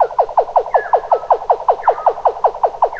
reinsamba Nightingale song dubmatic-rglr-zapper-rwrk
reinsamba made. the birdsong was slowdown, sliced, edited, reverbered and processed with and a soft touch of tape delay.
ambient, animal, bird, birdsong, delay, dub, echo, effect, electronic, funny, fx, happy, natural, nightingale, reggae, reverb, score, soundesign, space, spring, tape